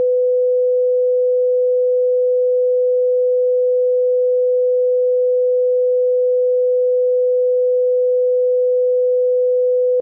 500hz sine wave sound